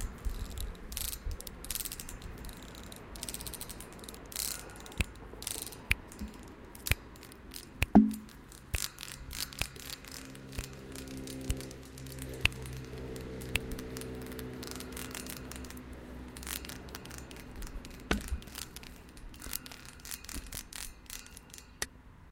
sonicsnaps LBFR Bhaar,Estella
Here are the recordings after a hunting sounds made in all the school. It's a grating.